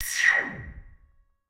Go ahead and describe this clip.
Short sound effects made with Minikorg 700s + Kenton MIDI to CV converter
FX, Korg, Minikorg-700s